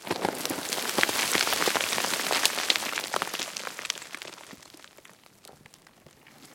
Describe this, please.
Small stone avalanche caused by dislodging some rocks from an overcrop.
Recorded with a Zoom H2 with 90° dispersion.
destruction, rock, avalanche, rubble, fall, slide, stone